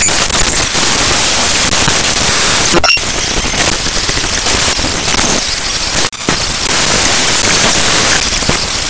Glitch - Star OCean
These Are Some Raw Data. Everyone Knows that Trick, Here's My take on it, Emulators (your Favorite old school RPG's), Open LSDJ in Audacity, Fun Fun.
cyber, distortion, glitch, data, snes, audacity